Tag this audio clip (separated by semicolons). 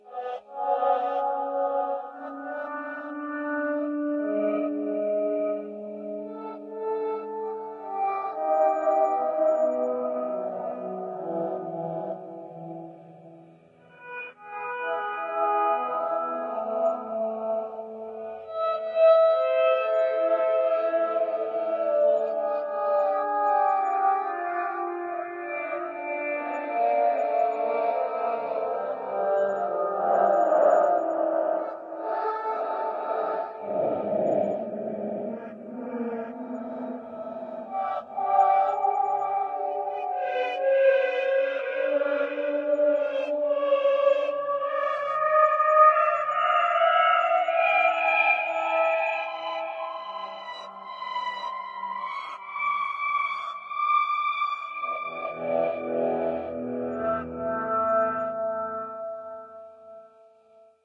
delay; guitar; reverb; reverse; solo